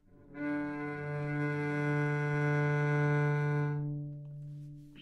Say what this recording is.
Cello - D3 - other
Part of the Good-sounds dataset of monophonic instrumental sounds.
instrument::cello
note::D
octave::3
midi note::38
good-sounds-id::399
dynamic_level::p
Recorded for experimental purposes
cello, Fsharp7, good-sounds, multisample, neumann-U87, single-note